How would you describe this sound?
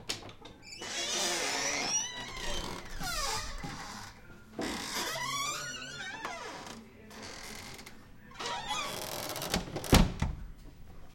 wood door old open close slow creak steps enter
door, close, open, old, slow, creak, wood